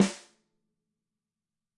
dry snare center 05
Snare drum recorded using a combination of direct and overhead mics. No processing has been done to the samples beyond mixing the mic sources.
dry, acoustic, multi, drum, real, snare, instrument, velocity, stereo